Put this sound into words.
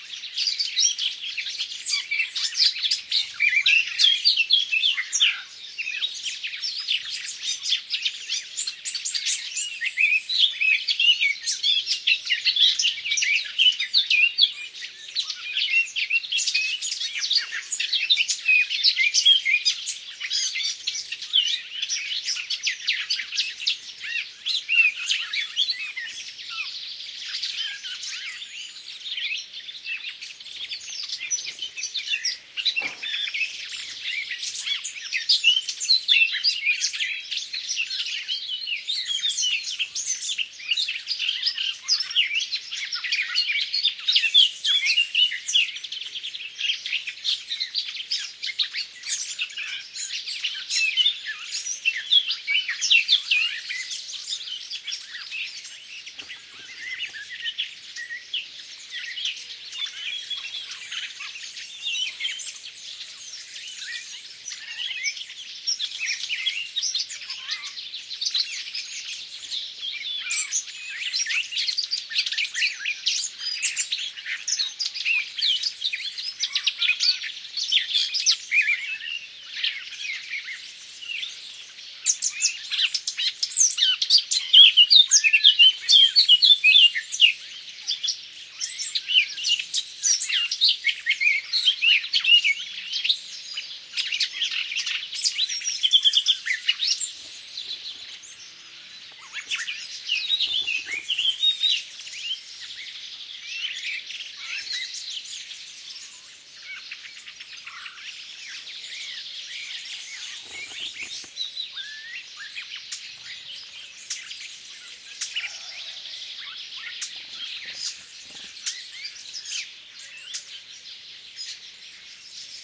Blackcap singing amazingly, other birds in background, just a couple minutes. I recorded several hours of this which I can upload if you really like, that would give me be the perfect excuse to get on top of the uploaders :-)